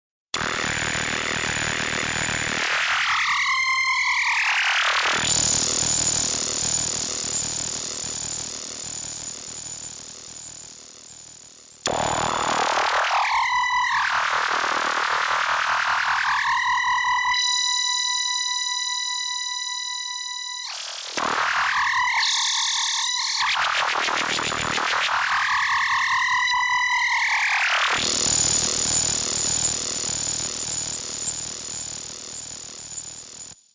Sub 37 Synth Screamz
So many sounds with the Sub 37